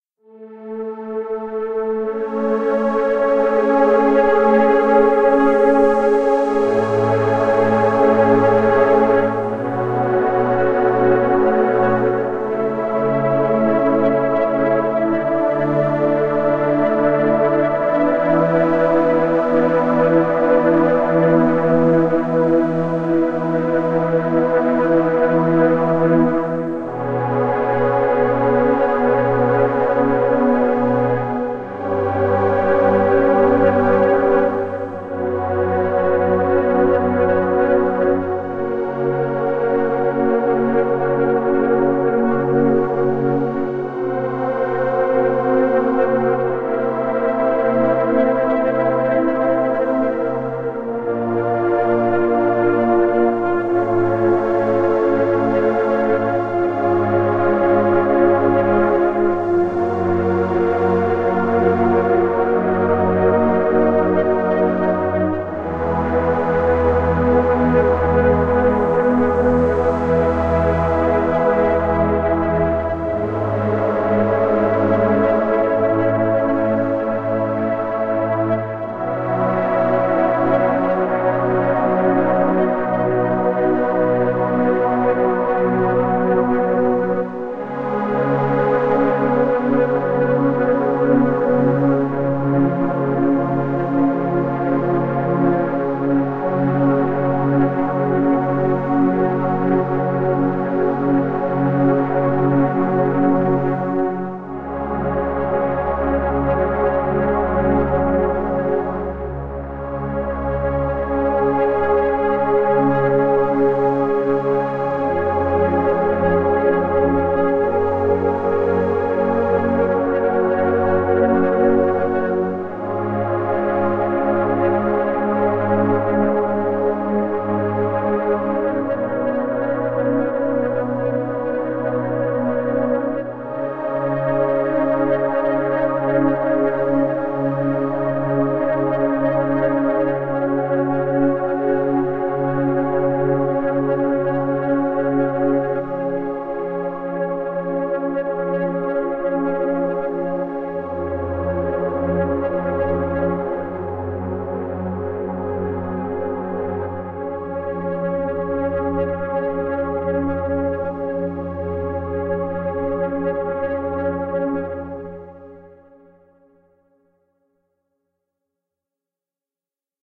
This is my improvisation, with midi-keyboard, you can use it with out my permission and you don`t have mention my name.
Synthetic, Artificial, Ambiance, Improvisation